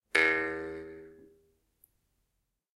Jew's Harp, Single, A (H4n)
Raw audio of a metal jew's harp being played with the vowel 'A/E' with no vibrato or breathing. Recorded simultaneously with the Zoom H1, Zoom H4n Pro and Zoom H6 to compare quality.
An example of how you might credit is by putting this in the description/credits:
The sound was recorded using a "H1 Zoom recorder" on 11th November 2017.
boing, cartoon, H4, harp, jew, jew-harp, jews, s, single, twang